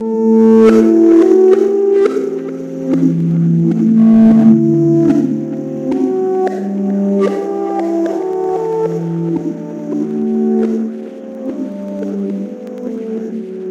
Nord keys 1 Dirty Apollo 13
Nord Lead 2 - 2nd Dump